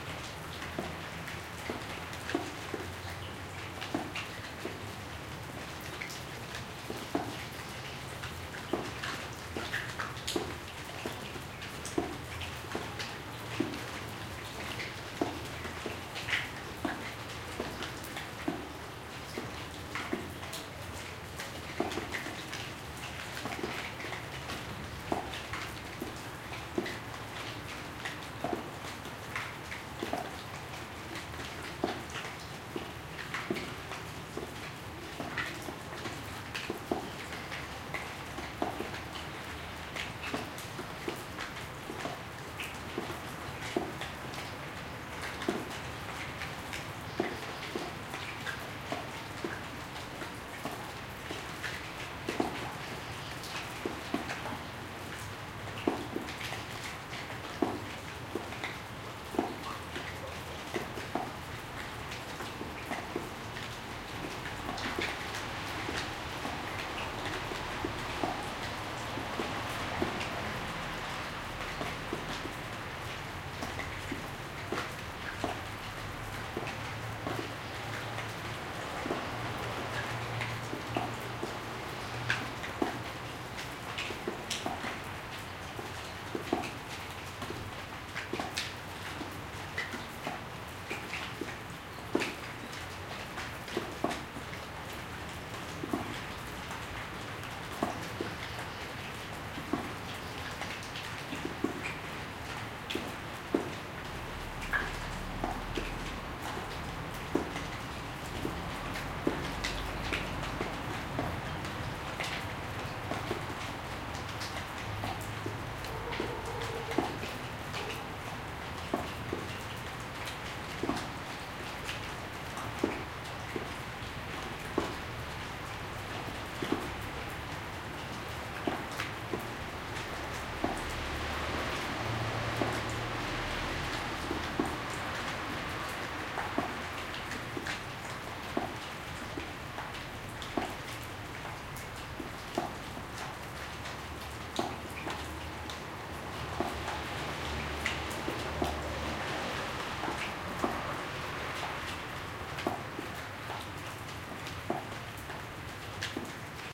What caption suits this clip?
Lama-Ta-It-rain 24-11-15-exc
Lama is a small village near Taranto, Puglia, Italy. It was raining among the trees and a plastic cover. Recorded with a Zoom H2.
ambiance, ambient, bird, birds, droplet, field-recording, Italy, nature, Puglia, rain, Taranto, traffic, water